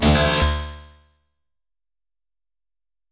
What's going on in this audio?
Audacity:
Cut section from 29.478s to 30.395s
At sound`s end
- Generate→Silence...
Duration: 00h 00m 0.998s
- Effect->Echo
Delay time: 0.005
Decay factor: 0.9
- Effect→Normalize...
✓Remove DC offset
✓Normailze maximum amplitude to: –3.0
✓Normalize stereo channels independently
- Effect->Change Speed
Speed Multiplier: 0.370
Percent Change: –63.000
First delete section from 0.418s to 2.479s
Next delete section from 1.010s to end
lazer, sci